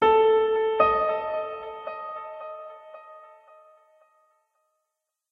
Two tones creating tension, part of Piano moods pack.
calm, delay, mellow, mood, phrase, piano, reverb